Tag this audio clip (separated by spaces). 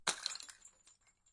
bottle-breaking bottle-smash liquid-filled